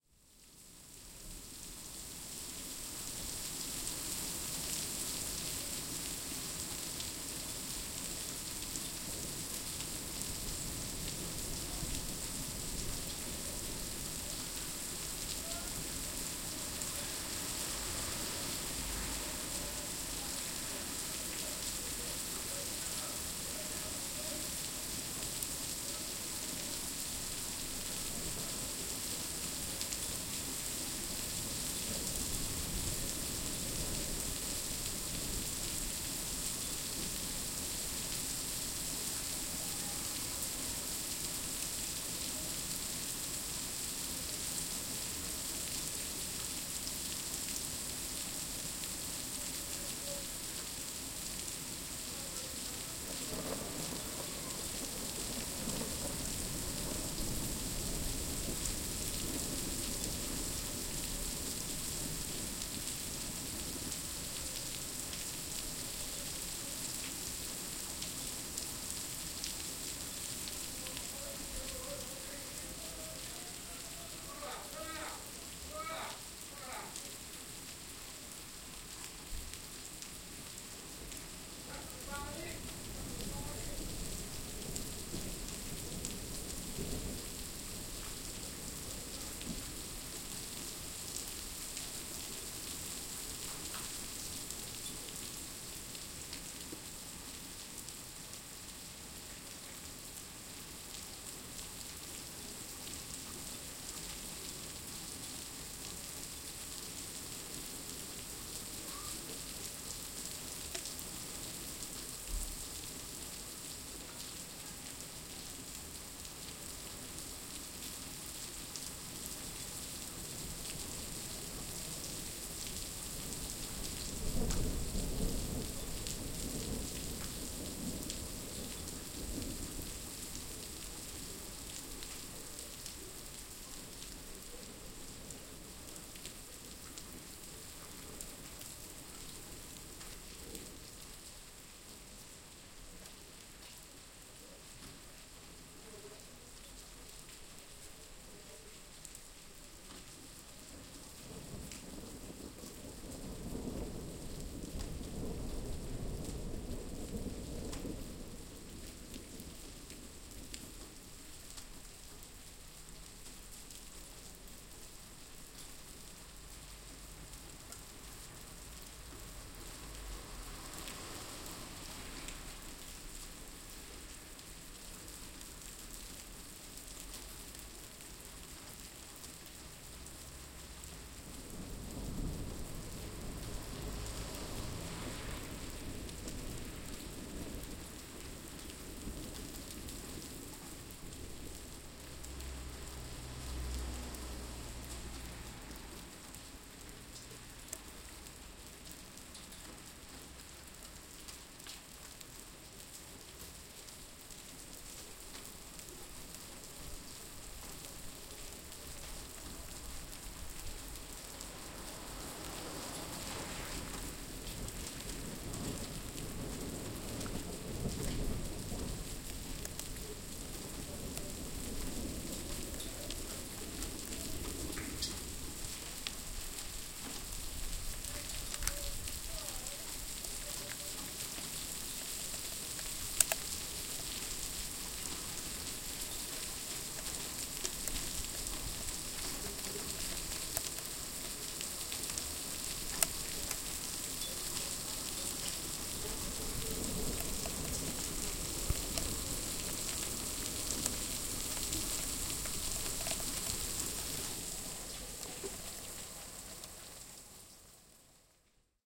Oxford Rain
This was recorded from my windowsill facing onto a tarmac driveway in August 2012. There is the occasional tinkling sound of raindrops hitting a metal gate, some traffic noise, the occasional bit of thunder, and some shouts of people getting wet. At the end, raindrops start falling onto the recorder.
This is fairly heavy rain by Oxford standards; we probably get something like this only a couple of times a year. This fall turned into hail a bit later.
Recorded with a Marantz PMD661.
tarmac, rain, gate, thunder, oxford